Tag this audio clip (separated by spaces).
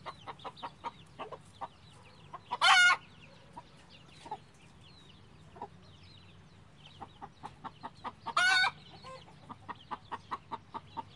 farmland country rural animals rura countryside farml